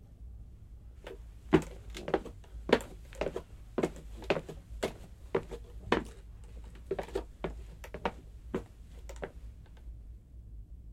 Footsteps on Wood Floor